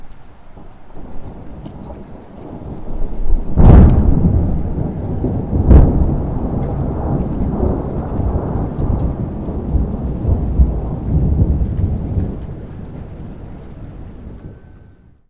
A recording of a single portion of thunder from a storm in southern california. This was recorded with a CAD U37 Digital Microphone!
Thunderstorm, Foley, Storm, Nature